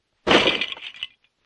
Lego fall apart2
One of several classic Lego star wars sounds that i recreated based on the originals. It was interesting...legos didn't really make the right sounds so I used mega blocks.